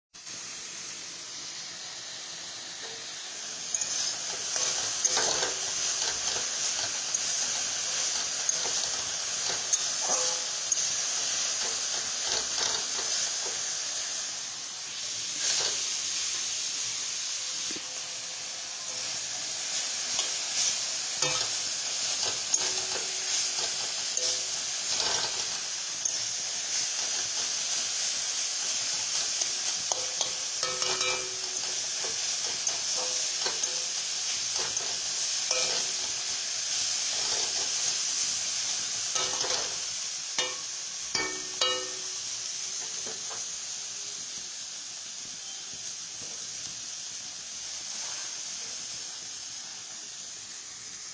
Indian coocking sound recorded by me.
indiancoocking, bengalicoocking